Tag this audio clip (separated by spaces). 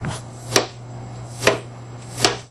broom dirty dustpan